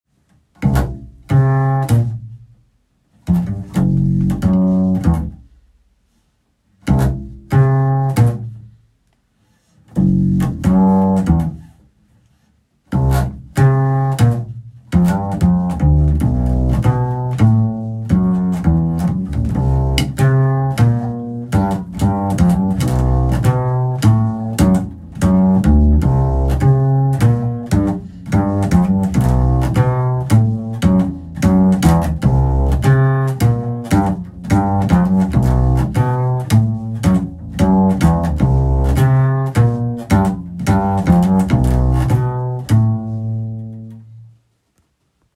Double bass stab improvisation